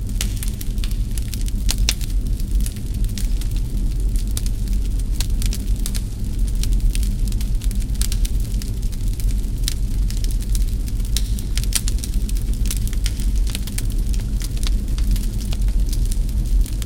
Fire in a fireplace taken from:
I've cut most of the original and made a loop of it.
If you use it, leave a link in the comments so I can hear it in action.
Enjoy!